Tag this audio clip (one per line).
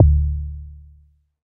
exotic
percussion
electronic